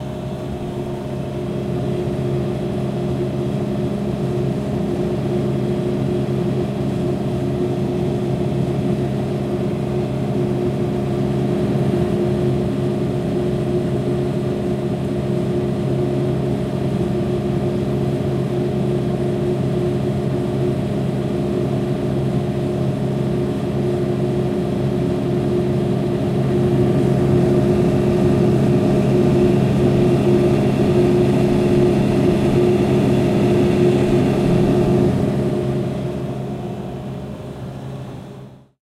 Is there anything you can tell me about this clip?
Standby hum of a vending machine